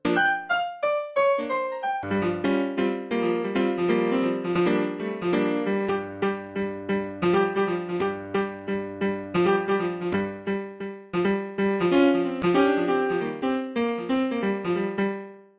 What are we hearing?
Jazz or blues piano samples.